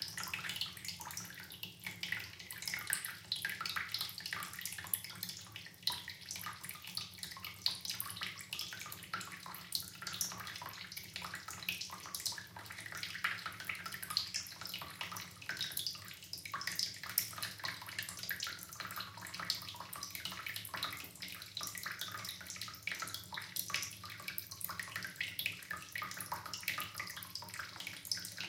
Water Stream Dripping
The sound of a thin stream of water from a reverse-osmosis water purifier pouring into a full container. The mouth of the container was approximately 4 cm across.
binaural, dripping, environmental-sounds-research, field-recording, splash, splatter, water, wet